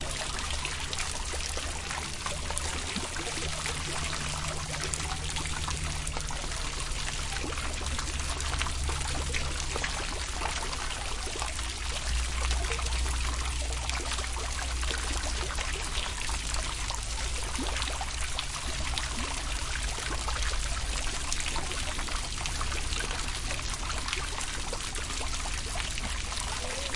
Running water fountain in the midle of the field, recorded with Tascam dr-05